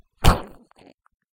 Another fleshy stab, this time without the metal 'shing' that comes with it.